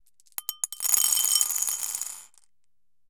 marbles - 15cm ceramic bowl - drop - handful of ~13mm marbles 01
Dropping a handful of approximately 13mm diameter glass marbles into a 15cm diameter ceramic bowl.
glass-marble, bowl, ceramic-bowl, glass, marble, dish, marbles, drop, ceramic, dropped, dropping